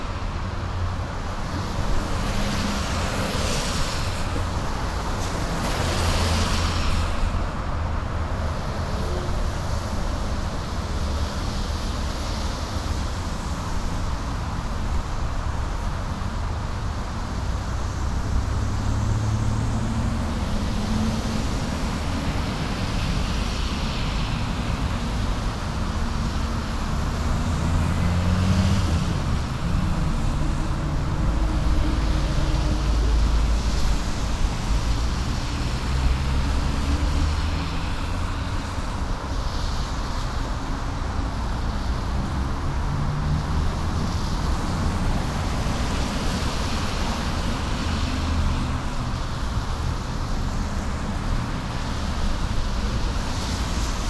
traffic blvd wet 01-01
steady, wet